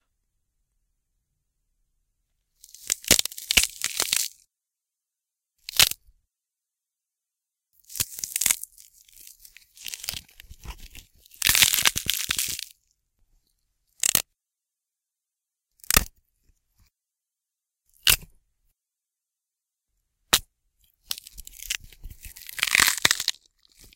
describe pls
Recorded celery snapping in Audio Booth, with Yeti USB Mic direct to iMac, for use as Foley broken bones sound. Edited with Audacity.
Recorded in isolation Audio Booth